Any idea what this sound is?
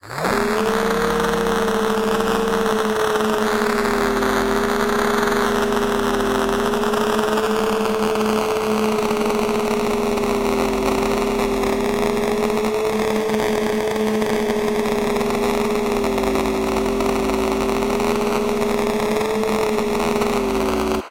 Radio Idle
Part of a game jam I'm doing with friends. radio of the main character in its default idle state. Made from scratch with Dimension pro
fm, FX, idle, interference, noise, radio, static